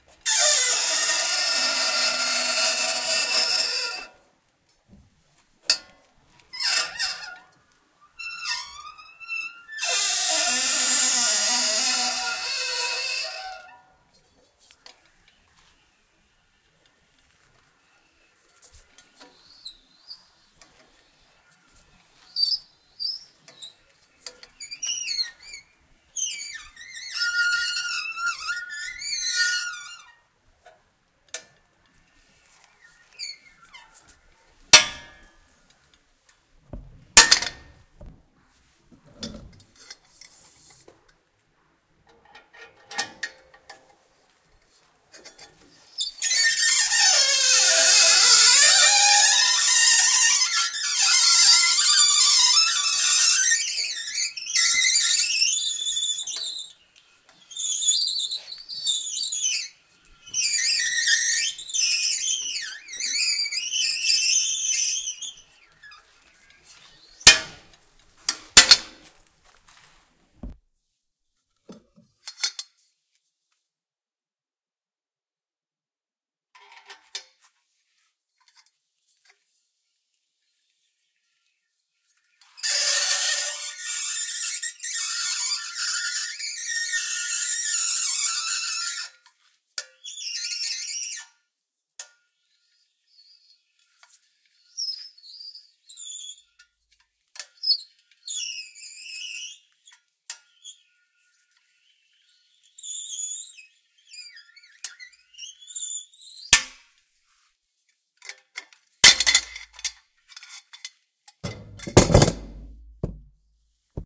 Pretty much what the file name says. Can't remember exactly what made the sound, or what I recorded it with. I think it was the hinge on a metal door.